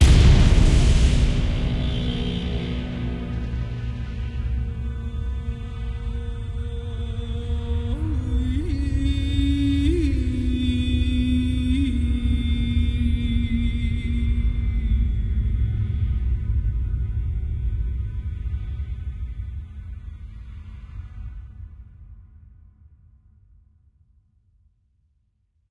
Voice of end 2
Sweet voice - Franchesca, NI Kontakt Sampler. Enjoy, my best friends!
trailer-sound, speak, female, trailer-music, woman, voice, construction-kit, sexy, vocal